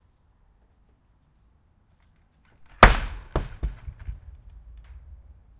Slamming Screen Door2
Slamming the screen door on a porch
screen, slam, door, slamming, close, closing, porch, south, shut